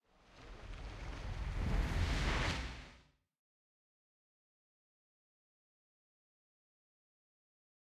FX FLASH-002
There are a couple of transitions that I recorded.